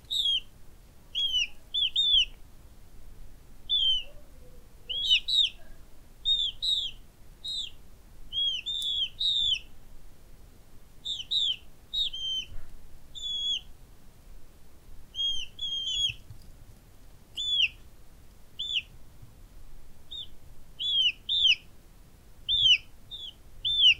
Two baby chickens gently peeping